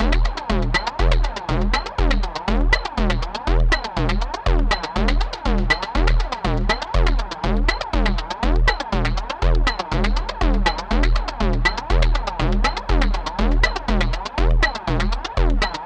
Zero Loop 8 - 120bpm
120bpm, Distorted, Loop, Percussion, Zero